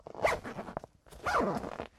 Zipper being zipped.
{"fr":"Fermeture éclair 6","desc":"Fermeture éclair.","tags":"fermeture éclair zip fermer ouvrir"}